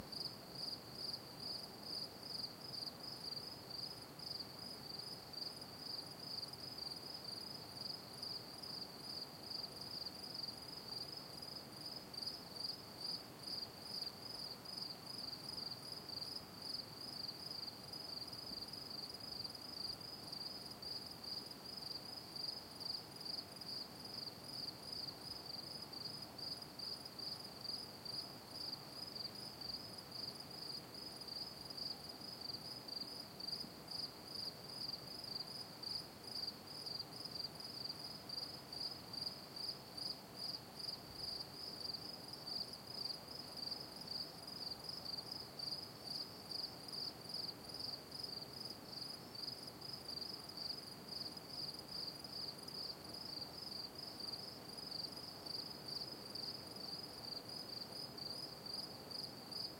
Ambiance Nature Night Cricket Calm Loop Stereo
Night Ambiance - Cricket, Calm - Loop
Gear : Sony PCM D100